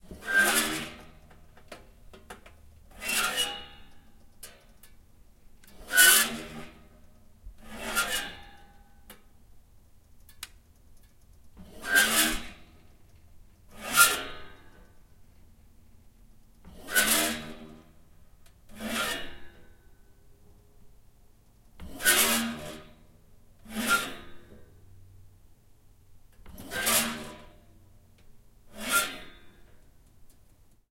My toaster oven's door makes this screeching sound whenever it gets hot. Pretty harsh and could be good for horror sound design.
Recorded with a Zoom H4N.